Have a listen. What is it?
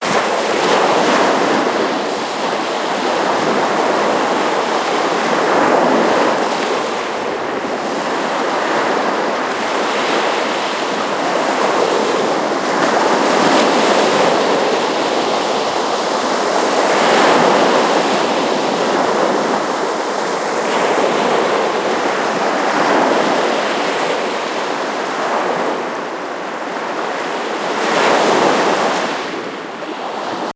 Sound of waves on the Black Sea coast.
Recorded by ZOOM H1 recorder.
Mastering by Logic Pro X.
The Black Sea is a marginal mediterranean sea of the Atlantic Ocean lying between Europe and Asia; east of the Balkan Peninsula (Southeast Europe), south of the East European Plain in Eastern Europe, west of the Caucasus, and north of Anatolia in Western Asia. It is supplied by major rivers, principally the Danube, Dnieper, and Don. The watersheds of many countries drain into the sea beyond the six that share its coast.
The Black Sea ultimately drains into the Mediterranean Sea, via the Turkish Straits and the Aegean Sea. The Bosporus Strait connects it to the small Sea of Marmara which in turn is connected to the Aegean Sea via the Strait of the Dardanelles. To the north, the Black Sea is connected to the Sea of Azov by the Kerch Strait.
beach, black, coast, gale, littoral, natural, nature, ocean, riparian, riverine, riverside, sea, seaboard, seashore, seaside, shore, storm, water, waves, weather, wind